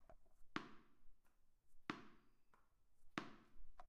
012 House BallBouncing
bouncing tennis ball sound
ball, house, toy